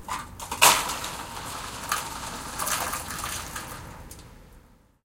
ice fall 01
An icicle breaks loose and falls two stories and smashes on frozen ice.
stereo, recorded using an M-Box Micro II recorder with the standard "T" mic.